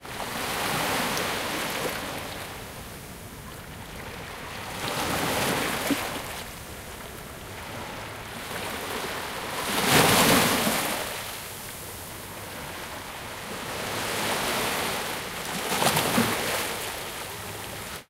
Recorded with Zoom H2N on a vacation on Cyprus.
Beach, Cyprus, Ocean, Sea, Waves, coast, field-recording, people, seaside, shore, water